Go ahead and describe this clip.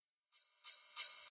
reverse sidesticks
sidestick stereo effects
side-stick, effects, sidestick, kit, percussion, drum, reverb, stereo, background, delay